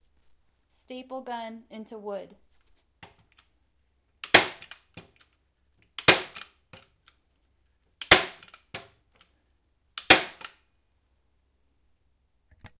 Staple gun into wood
garage, gun, into, power, staple, tool, tools, wood